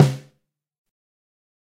Snare - sample from handmade Highwood Kit, recorded with a Shure SM57 [top] Rode NT5
drum, highwood, snare